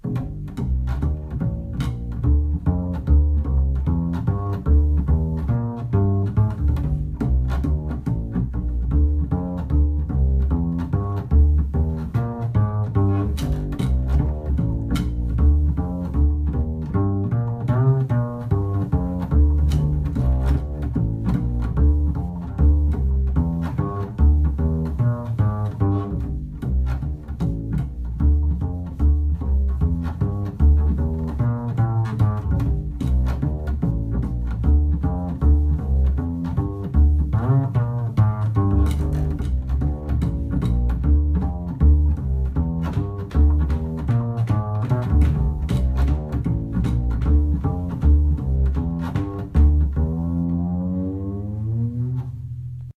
Double bass
notes, string-instrument, acoustic, strings, instrument, rocknroll, cello, bass, string, violoncello, classical, pluck, note, music, stringed-instrument, E, scale, pizzicato, double